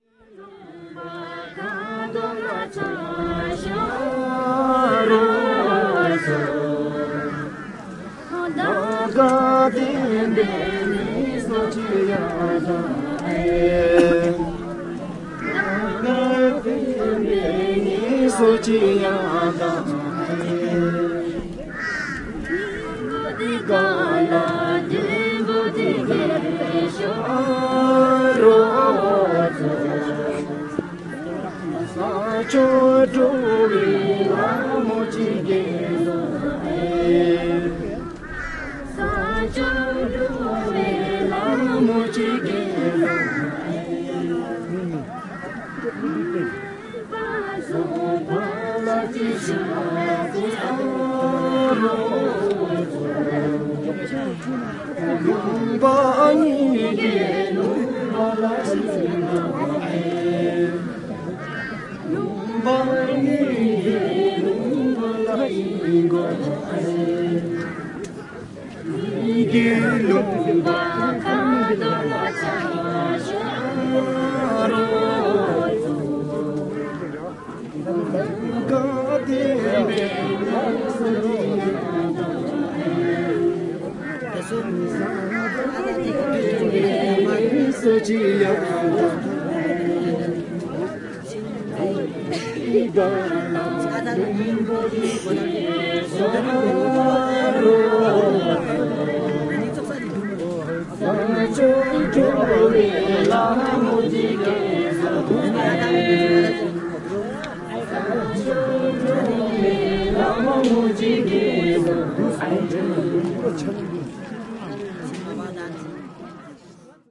Field recording of a folk song performed by the local residents of Ta Houng village as part of the yearly Buddhist festival(Tsechu), Tung Valley, Bumthang Region, Bhutan.
mini-disc